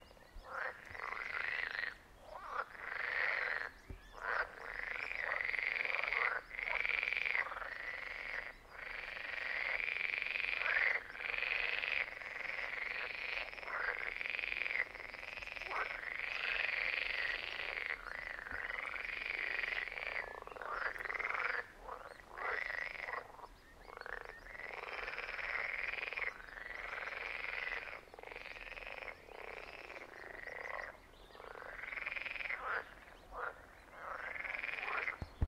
Nature sounds frog 3
Field-recording of a forest pond filled with frogs croaking loud.
Recorded with Zoom H1
croaking, frogs, nature, toad, water